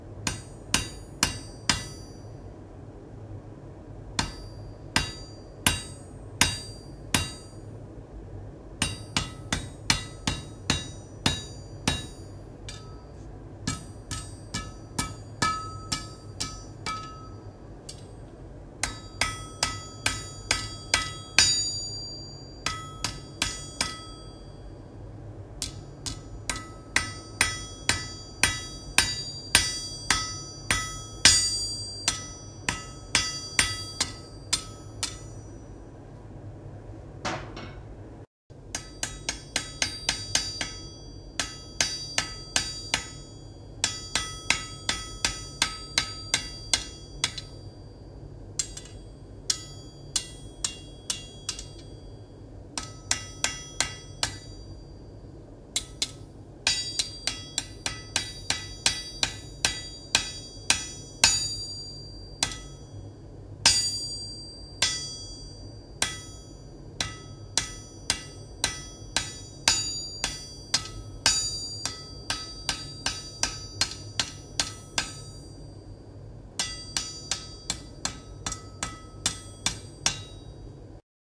Hammering on anvils and metal pieces, some metal pieces were resonating as well. Recorded using the Røde ntg3 mic and onboard mics of the Roland r26 recorder.